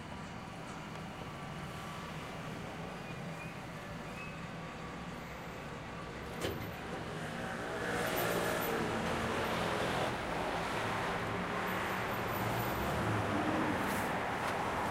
SonicSnaps-IDES-FR-street
The street in front of IDES.
A motorbike and an open top bus full of tourists passed by.
Paris, street, FranceIDES